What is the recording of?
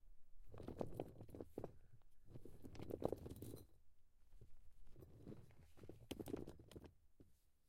glassy stones rumbling